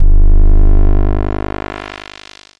Logotype, Raw Intro Outro, Trailer 02
Logotype / Raw Intro Outro / Trailer
This sound can for example be used for logos / logotypes in videos, for example tutorials, or why not for example use this sound in a documentary about someone who had a tough life; maybe an MMA-fighter telling about his hardships as a youngster in the streets? - you name it!
If you enjoyed the sound, please STAR, COMMENT, SPREAD THE WORD!🗣 It really helps!
cinematic, cutscene, game, intro, introduction, logo, music, outro, outroduction, raw, trailer